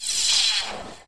as always, sounds are made on linux using the various softsynths and effects of the open source community, synthesizing layering and processing with renoise as a daw and plugin host.